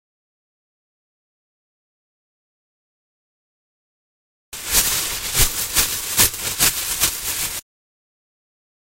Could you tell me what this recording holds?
Rustling Bushes
Foley sound effect for rustling leaves or bushes
Foley, rustling